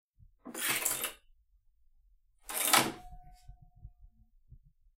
Door Handle
The sound of a hand pulling on the handle of a door, either to open the door or close it. Recorded by myself, using my own door.
close, open, handle, door